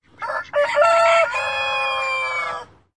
B11 sleeping room
listen to the sounds inside a sleeping room